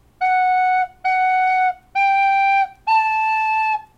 Short simple melody played on a tin whistle